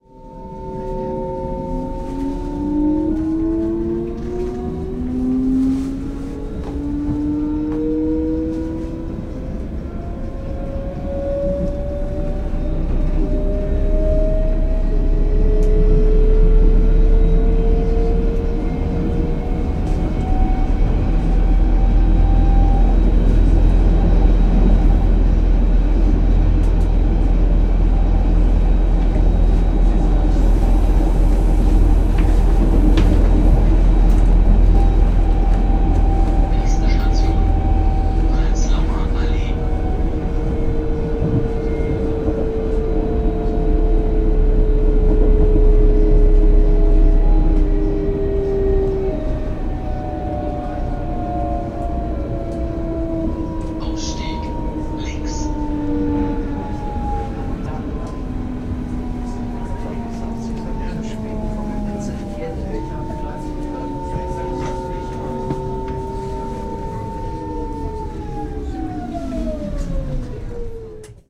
S-Bahn - Ring - Prenzlauer Allee
Ambience recorded in the S-Bahn "Ring" around the station Prenzlauer Allee, Berlin
Ring, field-recording, german, s-bahn, Berlin, suburban-train, Prenzlauer-Allee, ambience